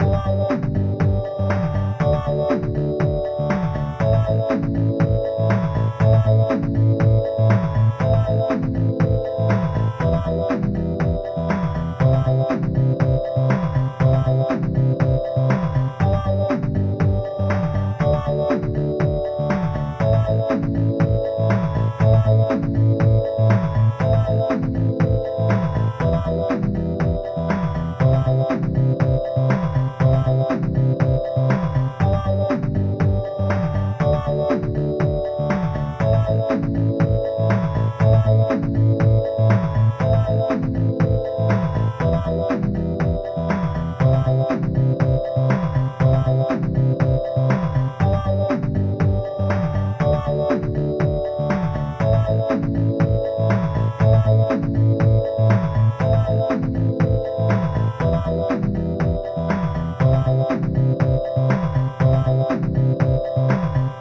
8 bit game loop 006 simple mix 1 long 120 bpm
120, 8, 8-bit, 8bit, 8-bits, bass, beat, bit, bpm, drum, electronic, free, game, gameboy, gameloop, gamemusic, loops, mario, music, sega, synth